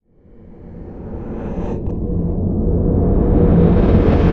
Passing trough black light
How would it sound like to pass trough black light?